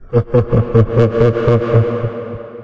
Evil Laugh Loud 1
Sound of a man loudly laughing with Reverb, useful for horror ambiance
creepy, laugh, spooky, sinister, fearful, fear, horror, scary, phantom